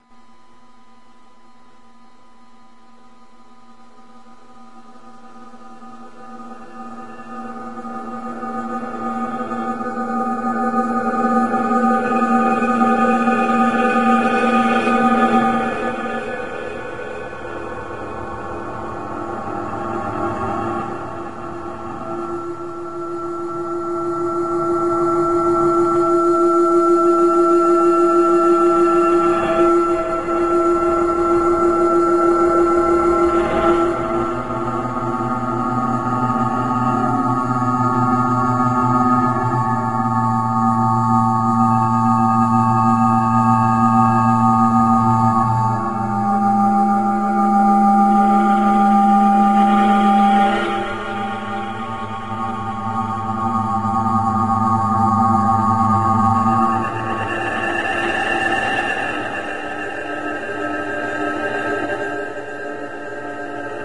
alien mainframe room
alien, ambience, ambient, background, creepy, dark, drone, effect, fear, film, filter, fx, game, reverb